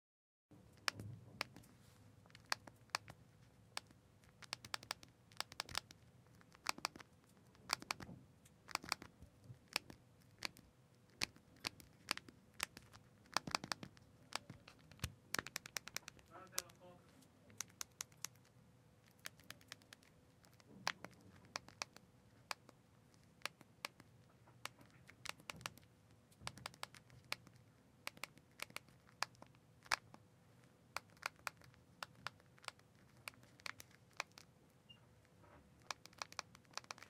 Cell Phone Dial Clicks